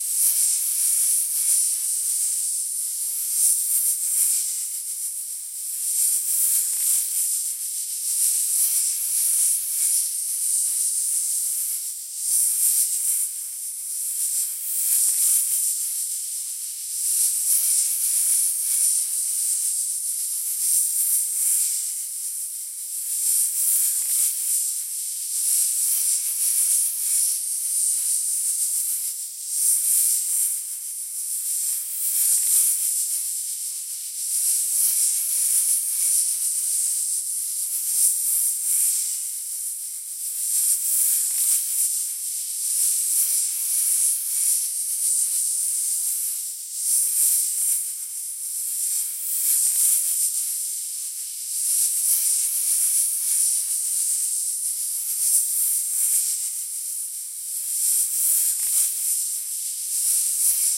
Snake Pit
I needed the sound of snakes hissing angry on the head of a "Medusa" in a Helloween-Radioplay - so here they are :) Recorded with Beringer B10, ESI Maya and Adobe Audition, done some processing (EQ, light chorus) - the Sound is loopable!
Voice, Snakes, Snakepit